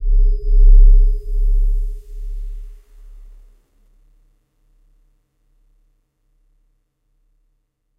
Not that is was that important after all considering the fact that the patch itself has a grainy character in the higher frequencies... No compressing, equalizing whatsoever involved, the panning is pretty wide tho, with left and right sounding rather different, but in stereo it still feels pretty balanced i think.